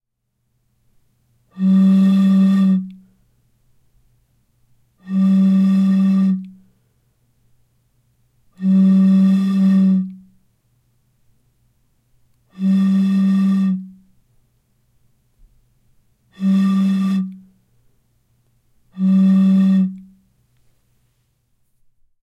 This Foley sample was recorded with a Zoom H4n, edited in Ableton Live 9 and Mastered in Studio One.
movement, glass, foley, microphone, bottle, rustle, sound-design, mic, field-recording